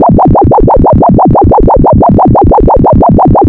SFX suitable for vintage Sci Fi stuff.
Based on frequency modulation.
vintage, scifi, synth, danger